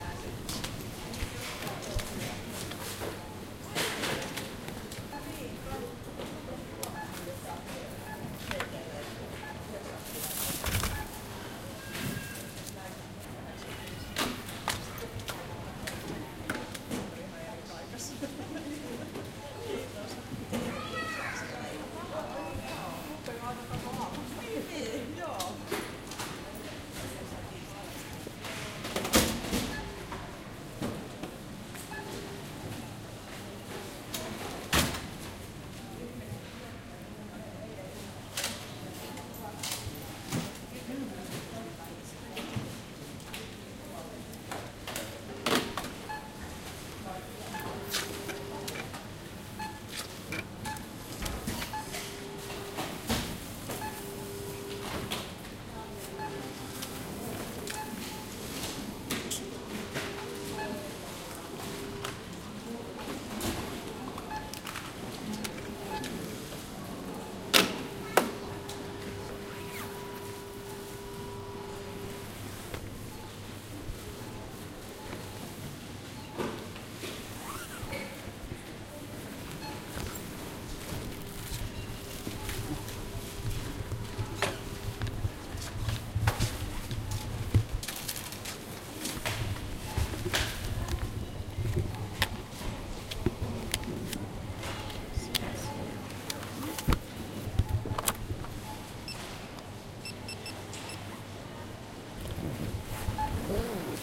shop cash register, some talk, cash register beeps when scanning items, pay with credit or debit card. typing 4 digit code. recorded with zoom h2n and edited with audacity. place: Riihimaki - Finland date: year 2013